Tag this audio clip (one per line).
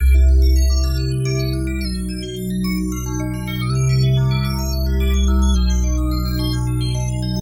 scientifically
bass
loop
ambient